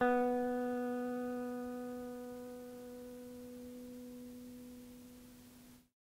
Tape El Guitar 5
Lo-fi tape samples at your disposal.